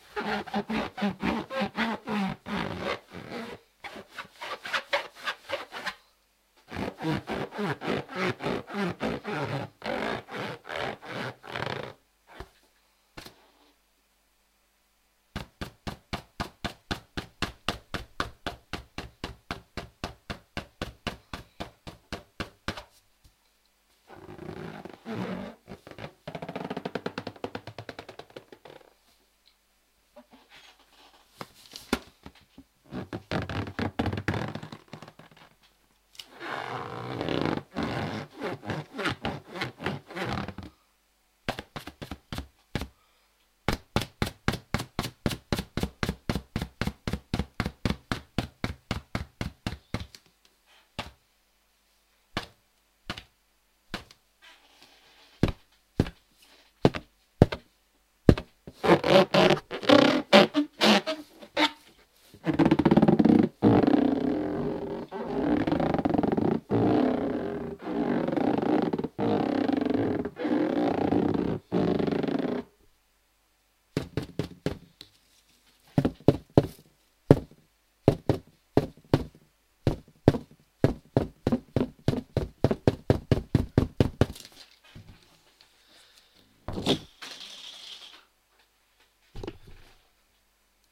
Balloon rubbing
Balloon, Bouncing, rubbing